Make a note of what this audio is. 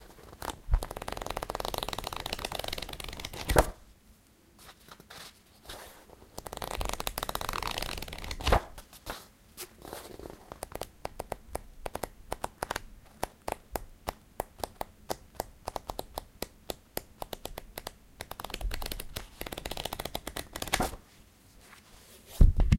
Going quickly through the pages of a book. Recorded with a Zoom H1.